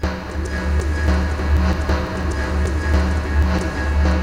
31oBpM FLoWErS The Girl Had My Sex - 4
Another edit of Loop #1. Loopable @310bpm! Made with WMCP, from the one and only Bludgeonsoft.
randomized, experimental, eclectic, weird, strange, loop